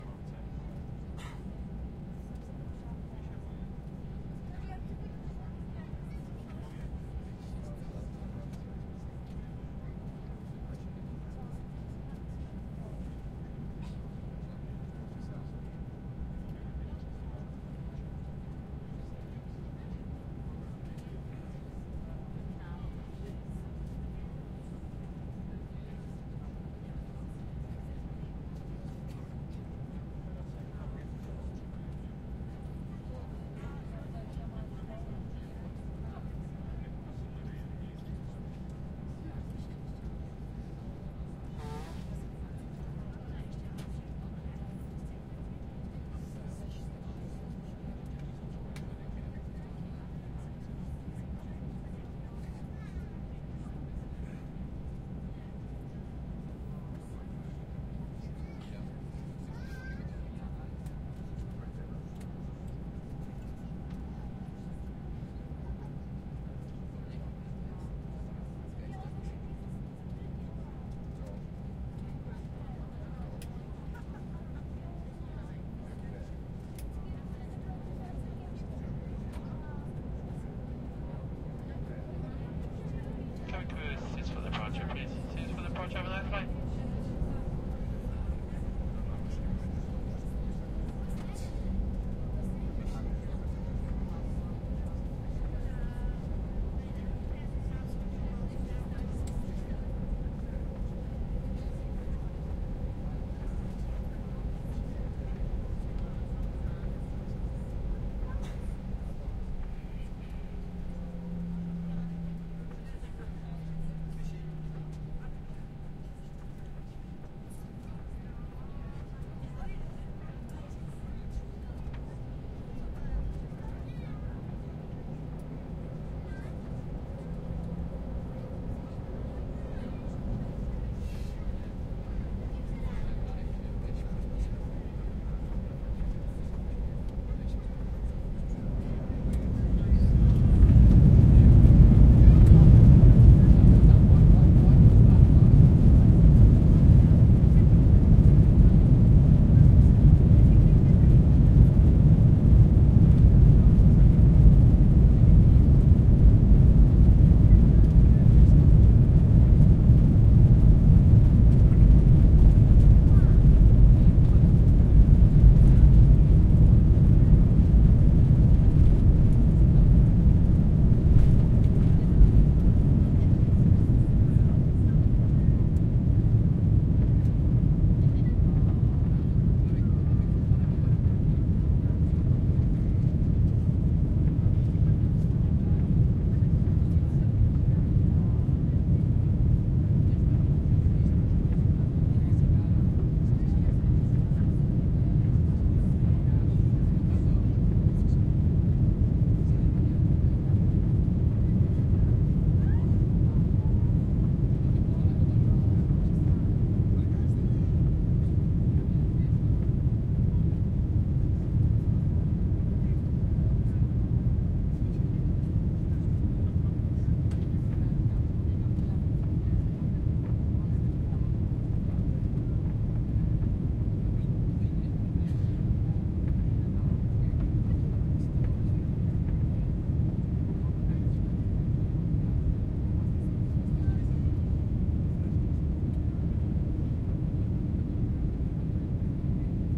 Airplane takeoff interior
Airplane taking off from Stansted London